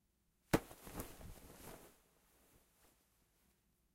Pushing forcefully through curtain or cloth
Loudly and forcefully passing through a curtain or cloth. recorded with a Roland R-05